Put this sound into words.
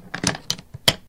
I recorded a lock while it locked up.
lock, switch